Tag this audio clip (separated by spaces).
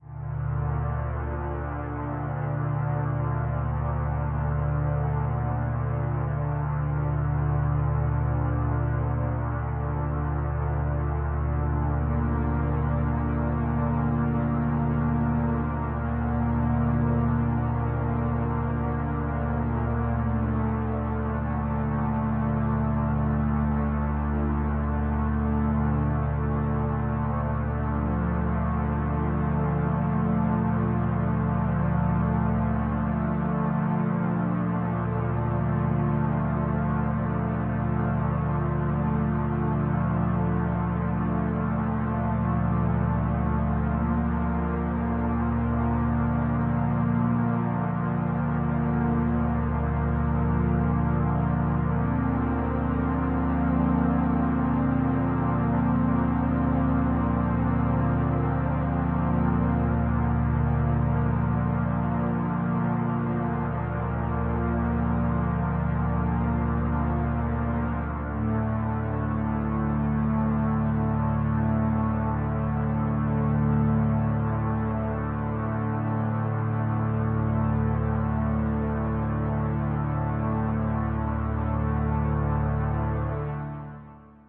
ambient dark deep drone musical pad soundscape